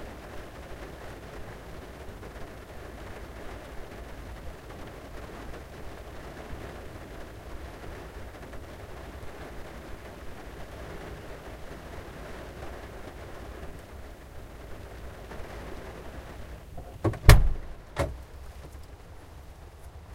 Rain Opening a Car Door - 1
Opening a car door in the rain.
car, close, door, driving, inside, open, outside, rain, road, storm, truck, van